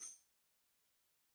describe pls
10 inch goatskin tambourine with single row of nickel-silver jingles recorded using a combination of direct and overhead mics. No processing has been done to the samples beyond mixing the mic sources.